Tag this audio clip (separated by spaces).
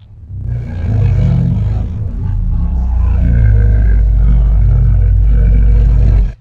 didgeridoo granular growl low reaktor